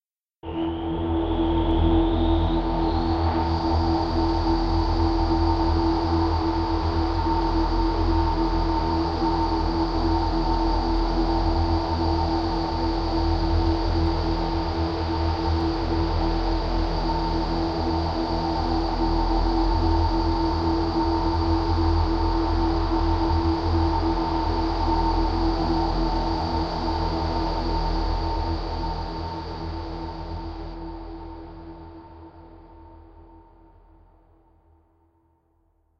Dark Star Drone
synthdrone. Synth used: Plex. Probably useful for horror or sci-fi movies.
processed, soundscape, lo-fi, atmosphere, electronic, sci-fi, abstract, synth, electro, horror, ambience, drone, cinematic, effect, dark, suspence, pad, noise